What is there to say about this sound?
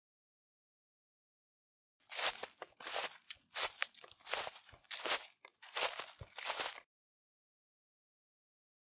Heavy walking with dry leaves.